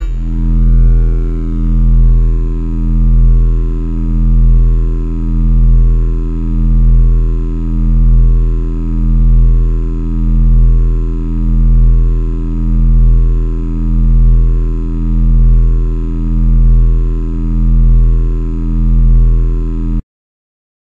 Self-made huge laser sound with big bass
(created with logic´s es2 / ringshifter / bit crusher)